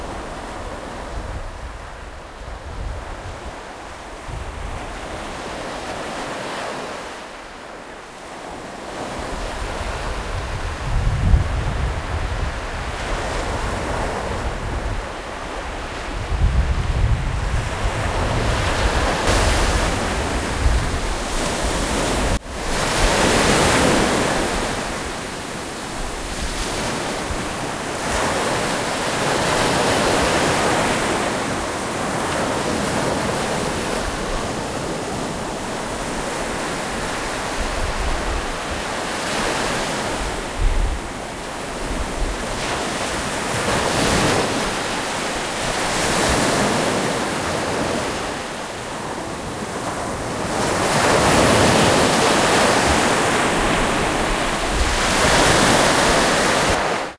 Seaside Stereo 2
Raw recording of the seaside. Edited with Audacity.